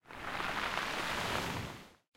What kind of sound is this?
Wave on Shore 1
A wave hitting a rocky shore. You can hear the rocks getting displaced by the wave.
beach, nature, rocky, water, waves